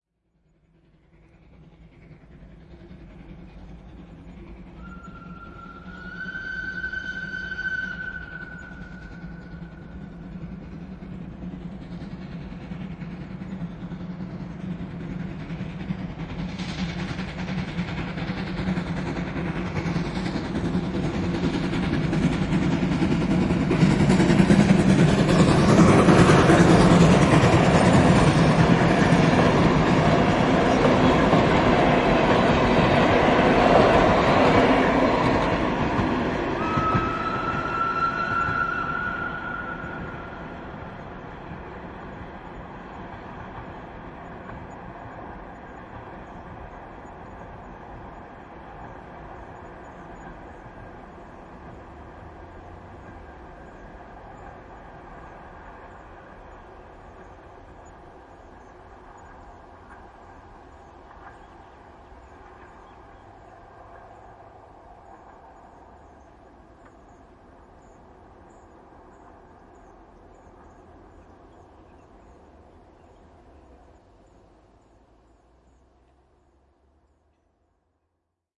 Juna, höyryveturi ohi, vihellys / A steam train, steam locomotive passing, whistle
Höyryveturi (Hr1 No 1004 "Ukko-Pekka") ja vaunut. Lähestyy viheltäen, ohiajo ja etääntyy viheltäen.
Paikka/Place: Suomi / Finland / Karjaa
Aika/Date: 01.06.1985
Steam-train, Finland, Finnish-Broadcasting-Company, Juna, Rail-traffic, Soundfx, Yle, Whistle, Vihellys, Trains, Rautatie, Junat, Railway, Suomi, Train, Tehosteet, Field-Recording, Raideliikenne, Yleisradio